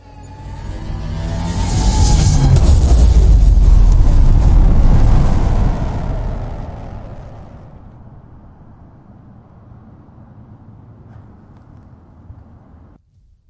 A bang when the spaceship enter in ultra-speed, created with FL.
bang, boom, effects, FL, Future, Futuristic, fx, high, rapide, Remixing, sci-fi, sfx, sounddesign, Sound-Effects, spaceship, ultra-speed